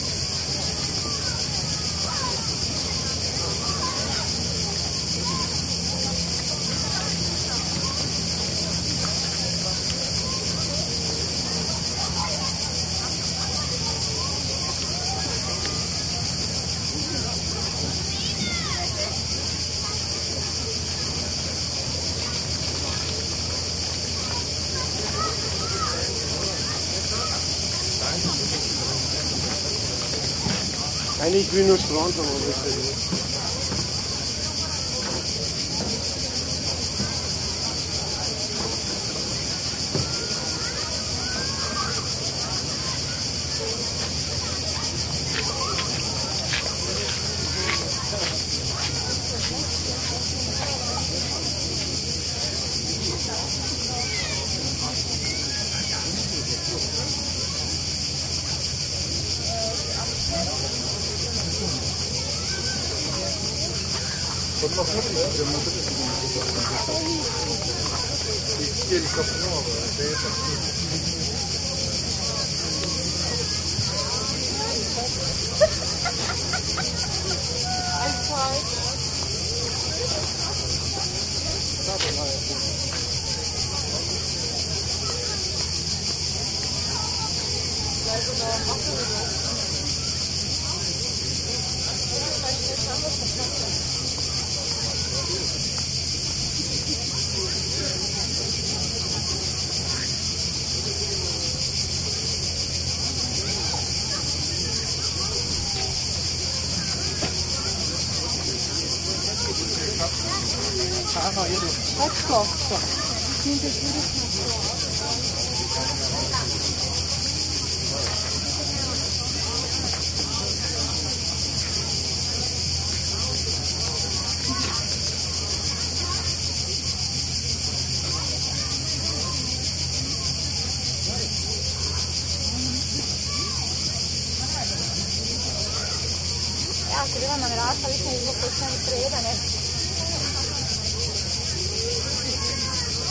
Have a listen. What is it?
120801 Brela AT PuntaRata 1 F 4824
The Punta Rata beach in Brela, at noontime. The beach is bustling with bathers from all kinds of countries (identifiable speech is mostly slavic and german dialects though). People walk by on the promenade in front of the mic.
These recordings were done during my recent vacation in Brela, Croatia, with a Zoom H2 set at 90° diffusion.
They are also available as surround recordings (4ch) with the rear channels set to 120° diffusion. Just send me a message if you want them, they're just as free as the stereo ones.
atmo, beach, Brela, crickets, Croatia, field-recording, Hrvatska, maritime, mediterranian, nature, noon, sea, water